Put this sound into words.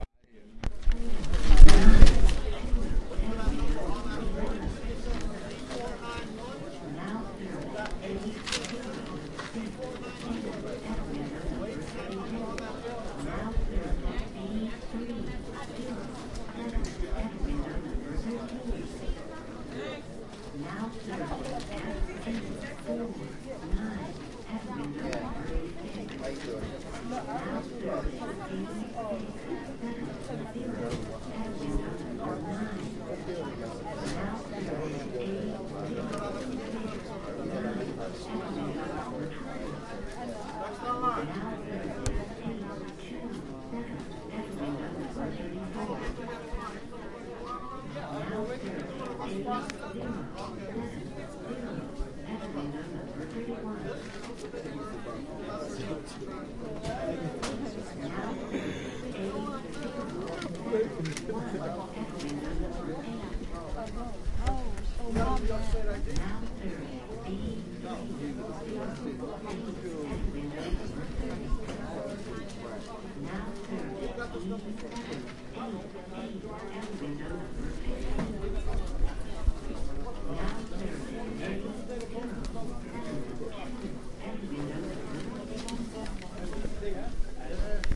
dmv - waiting
Waiting in line at the Department of Motor Vehicles endlessly waiting for my number to be called. Recorded on Zoom H1.
NOTE: All of my sounds can be used for whatever purpose you want. It if makes you a millionaire, that's great!
york, dmv, department, new, line, waiting, vehicles, city, que, motor, brooklyn